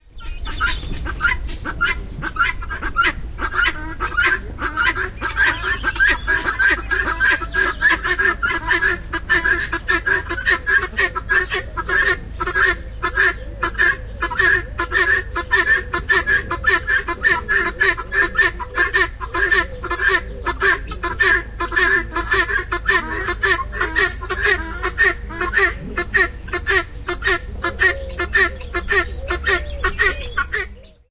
Birds in a zoo
A group of helmeted guineafowls calling loudly in a zoo. Recorded with an Olympus LS-14.
field-recording, animals